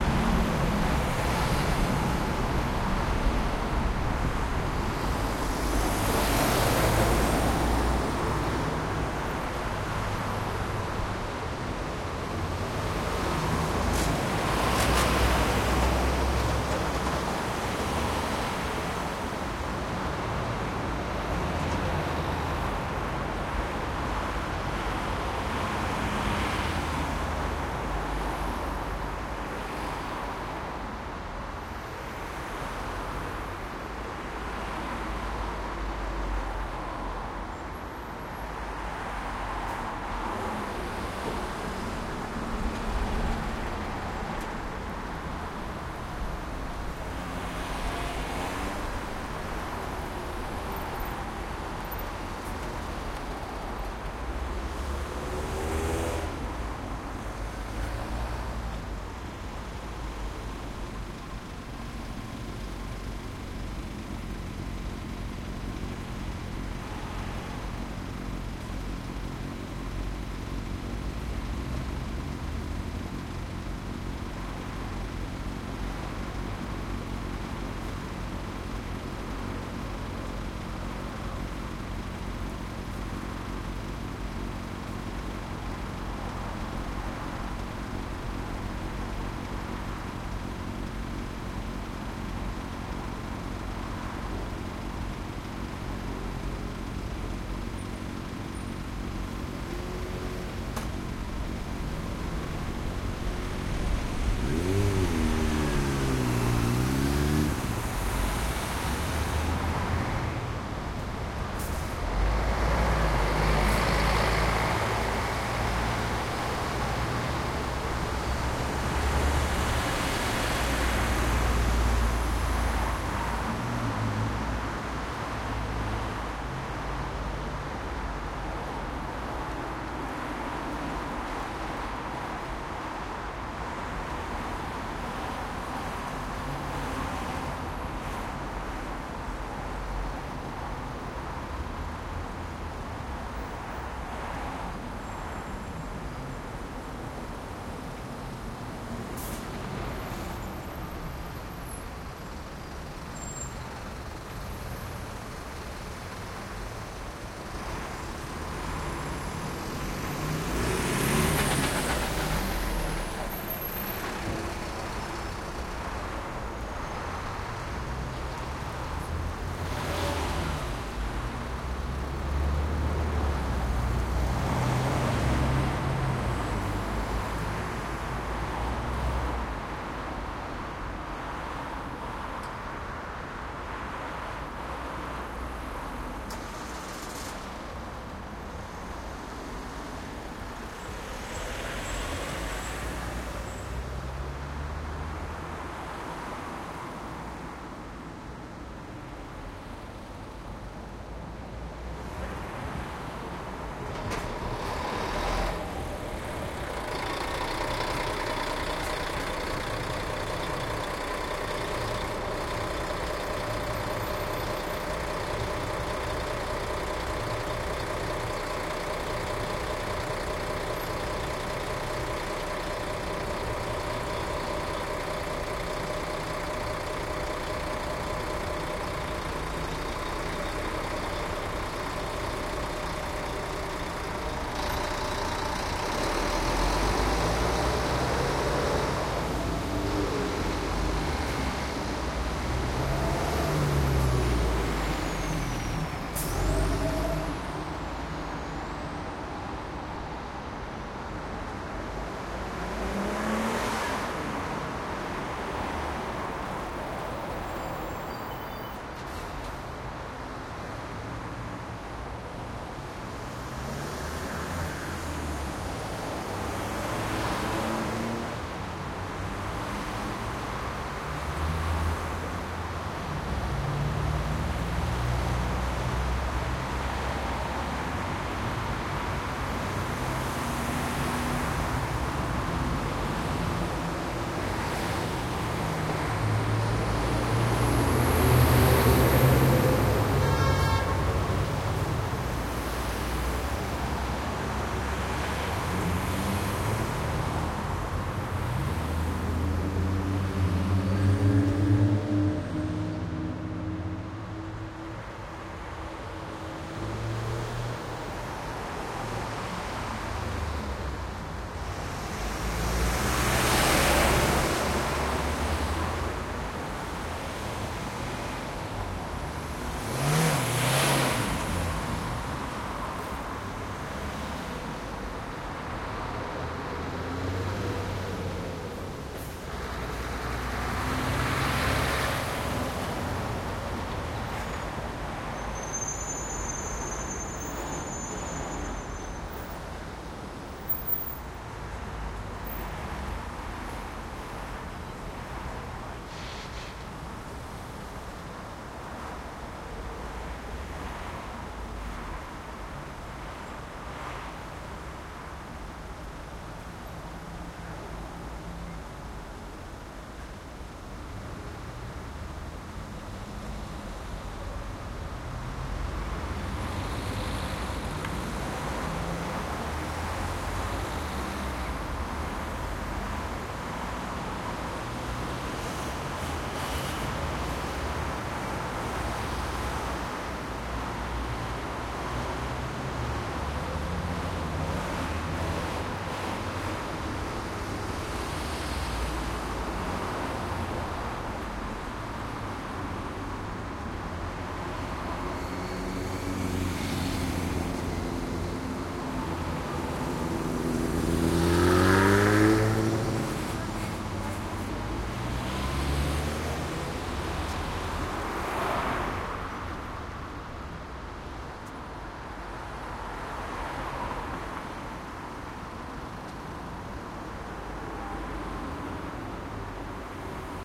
Traffic noise at main street in Berlin
Afternoon recording at a main street in Berlin.
My position was near a traffic light. So you can hear the stop and go.
90° angle to the street.
Recorder: Tascam DR-100 MkII
Location: Berlin, Charlottenburg, Main Street
Time: 05.06.2014 ~16:00
Weather: good weather
Mic: internal unidirectional
traffic, berlin, city, urban, light, cars, street, afternoon, noise, people, main, charlottenburg